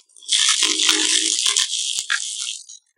I have crumple a paper in front of the microphone.
After, I have changed the height, and the sound look like a martian's voice.